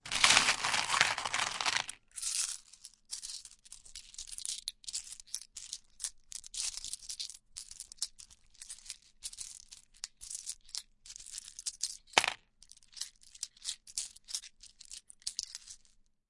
coins out of wooden box in hand counting
coins, counting, hand